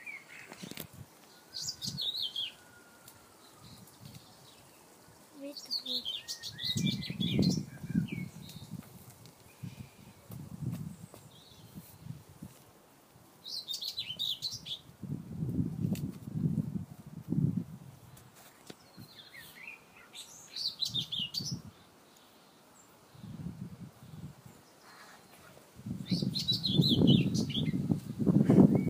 Sound of a whitethroat singing in spring. This recording was then played back to the bird seconds later, and this secretive warbler flew out of cover towards me, and began to sing right next to me.